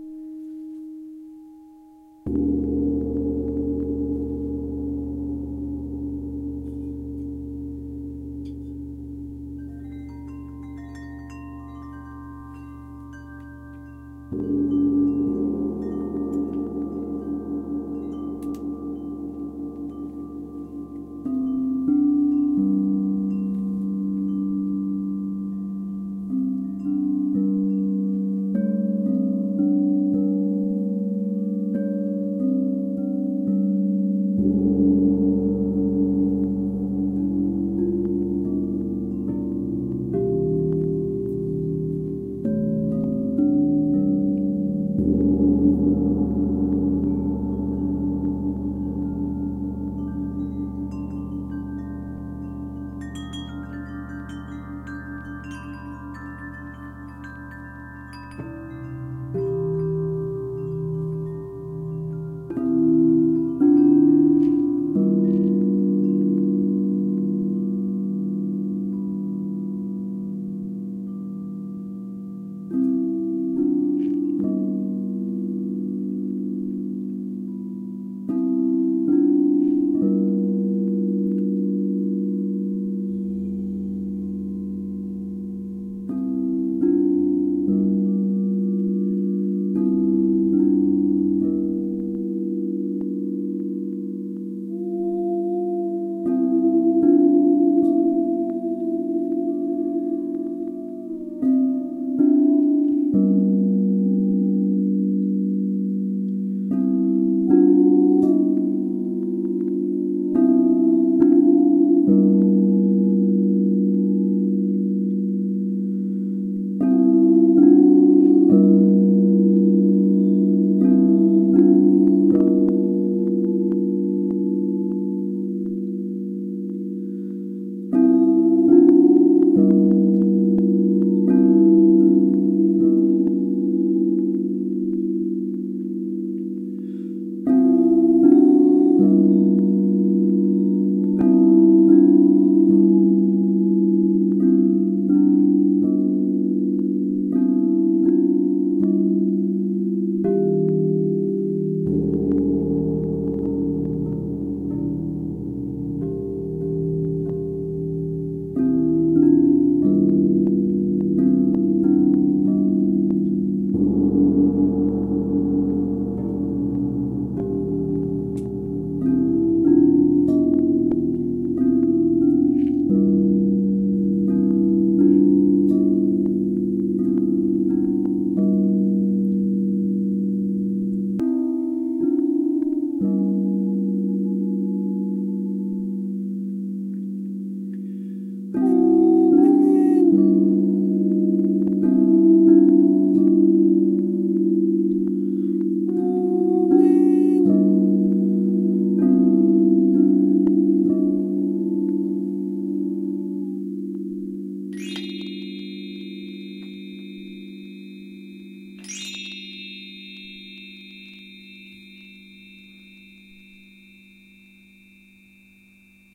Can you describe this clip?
Crystal Bowls, Gong, and Voice
Not a mix, this is a single track recording, segment of a live acoustic session, featuring crystal singing bowls of varying notes and sizes, 30-inch diameter Zildjian gong, and vocal toning aligned with the bowls. Recorded with Zoom H4N via onboard mics, placed 8-feet in front, centered, 2-feet above ground. Recorded on 2-12-2015 at 5:30 pm MST.
crystal-bowls, field-recording, gong, music-therapy, sound-healing, vocal-toning